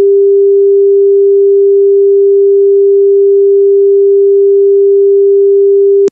Telephone unobtainable or disconnect tone recorded in the UK
disconnect, unobtainable, ring, uk, tone, telephone